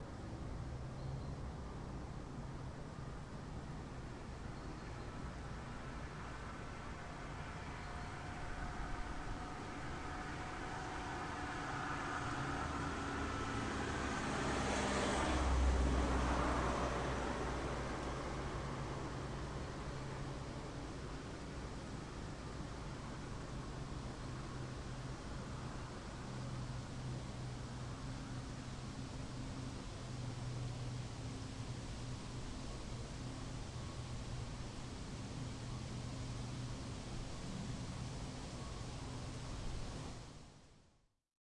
Car Passes Under Bridge
A car passing under a bridge on which I was standing. This was half way up Oliver's Mount in Scarborough.- Recorded with my Zoom H2 -
car-passing, car, motor, vehicle